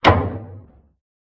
Metal Hit Cartoon
Cartoon-like sound of an impact with a metal object.
bang, boing, cartoon, clang, hit, impact, metal, metallic